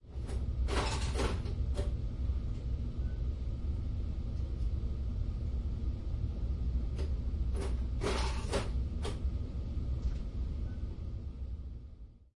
Them good ole candy machines. Insert quarter, Turn crank, Open hatch, Get what ever you asked for. Recorded on plextalk using experimental stereo mics.